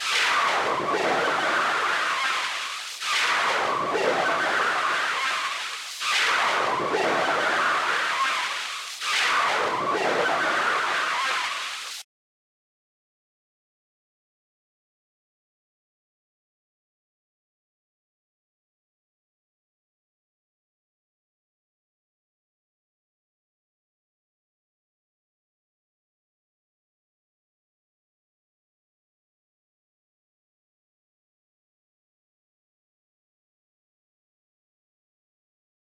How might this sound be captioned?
jovica flowerLoop-80 bassline high
atmos, remix, sphere